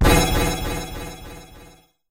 explosion beep kick game gamesound click levelUp adventure bleep sfx application startup clicks
sfx, levelUp, game, bleep, explosion, kick, startup, click, application, adventure, gamesound, beep, clicks